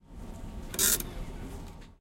INT-jizdenka
Noise of trams in the city.